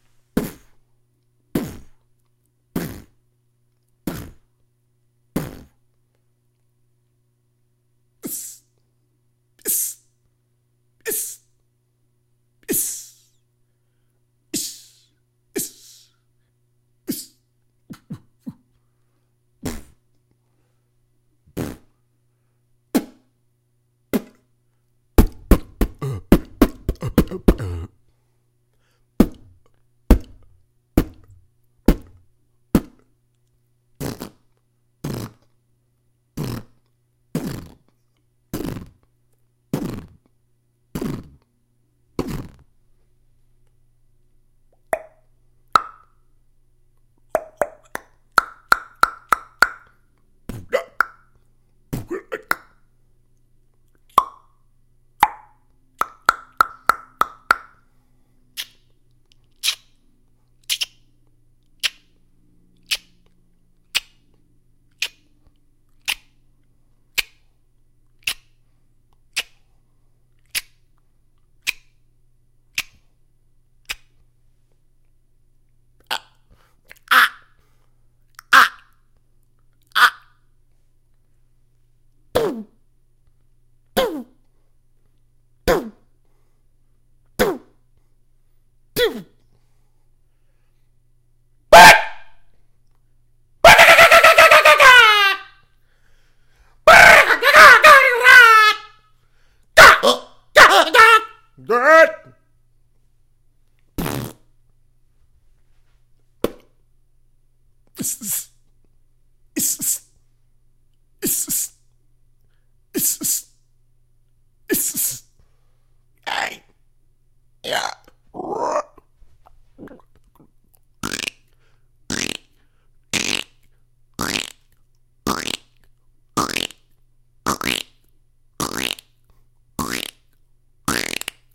Beatbox sounds by Deadman as used in my DeadmanBeatbox soundfont and upcoming Deadman Beatbox VSTi.